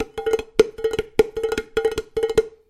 Stomping & playing on various pots
playing, egoless, vol, 0, pot, sounds, various, natural, stomps, rhytm